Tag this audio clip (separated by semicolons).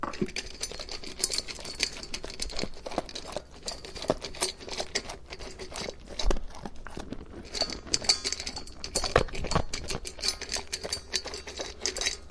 Dog; Animals